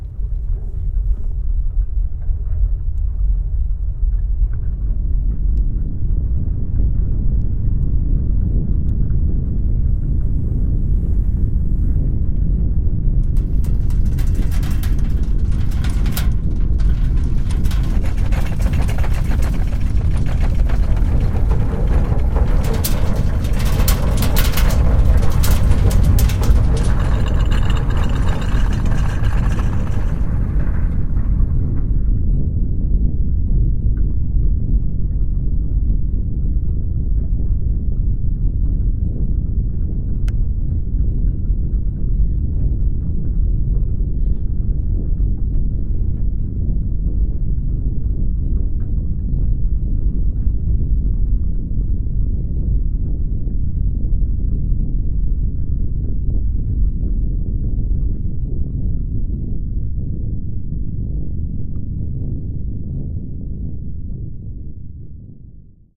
Earthquake produced with some low level Sounds and special Effects like rattling porcelain.
Use a powerful subwoofer to get the full enjoyment of the sound.